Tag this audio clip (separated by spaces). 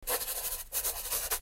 pencil drawing draw